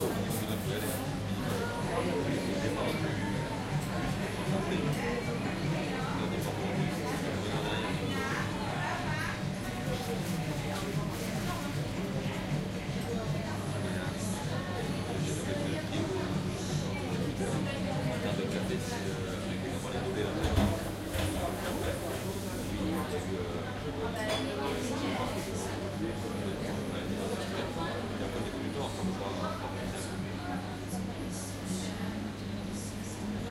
General noise and chatter inside a coffeehouse in Galeria Krakowska, Krakow, Poland